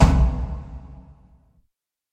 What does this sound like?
Tom with reverb effects processed with cool edit 96.